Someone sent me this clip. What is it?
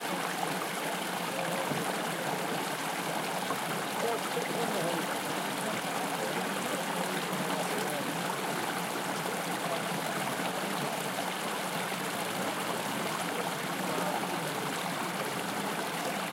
Stream and Voices 02 (Ceredigion)
Field-Recording, Wales, Rocks, Stream, Chatter, Water, Movement